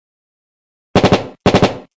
Battle Rifle
Various Gun effects I created using:
different Snare drums and floor toms
Light Switch for trigger click
throwing coins into a bowl recorded with a contact mic for shell casings
Battle, guns, rifle